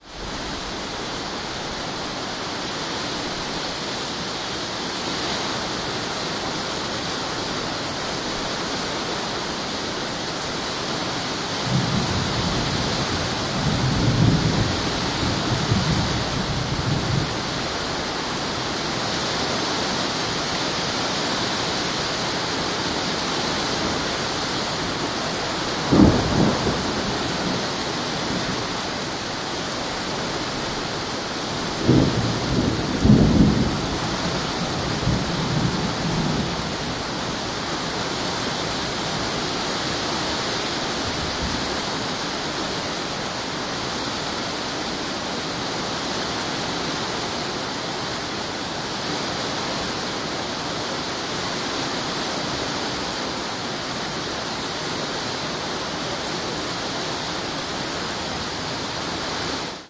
rain heavy
Heavy tropical rain. San José, Costa Rica.
Equipment: Tascam DR-100 mkii, Peluso CEMC-6 (Cardioid cap), ORTF.
field-recording, nature, rain, water